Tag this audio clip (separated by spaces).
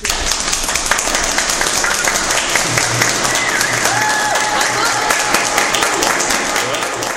clap applauding applause crowd